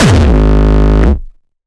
Hardstyke Kick 17
bassdrum, distorted-kick, distrotion, Hardcore, Hardcore-Kick, Hardstyle, Hardstyle-Kick, Kick, layered-kick, Rawstyle, Rawstyle-Kick